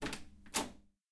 Office door. Recorded with Zoom H4n